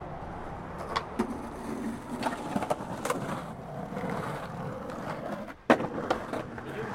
skateboarders attempting tricks - take 04